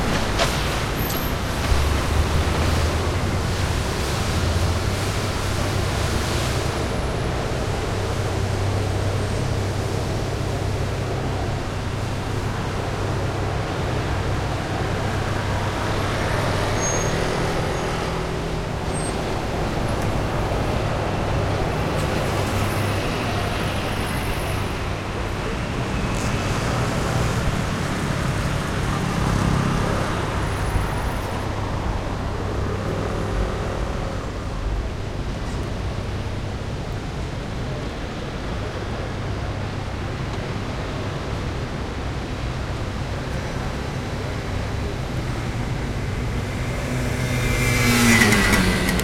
collab-20220426 PlacaLlucmajor Transit Noisy
Urban Ambience Recording in collab with La Guineueta High School, Barcelona, April-May 2022. Using a Zoom H-1 Recorder.
Noisy, Transit, Wind